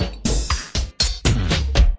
All loops in this package 120 BPM DRUMLOOPS are 120 BPM 4/4 and 1 measure long. They were created using Kontakt 4 within Cubase 5 and the drumsamples for the 1000 drums package, supplied on a CDROM with an issue of Computer Music Magazine. Loop 61 is another classic groove.